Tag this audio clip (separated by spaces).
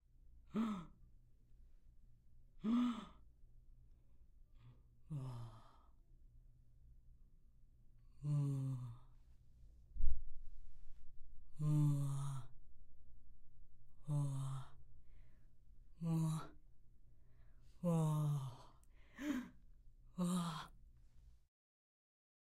Impressed Man Murmur